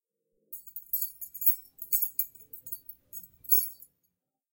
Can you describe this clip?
cat bell
a bell of a cat collar